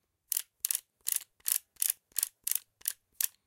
Spinning revolver cylinder 5
Spinning through the chambers of a revolver. recorded with a Roland R-05